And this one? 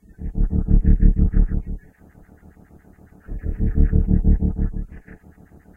Modified ejfortin's Nano_Blade_Loop to pan back to the left and have longer quiet sections.
engine, hum, loop, pan, reactor, throb, thrum, warpcore